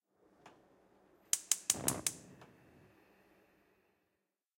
Gas-Lit Stove
High-Quality recording of lighting a stove, including that roar from the flame's ignition.
fire, ignition, ignite, gas-lit, stove, burning, flame, stove-top, lighter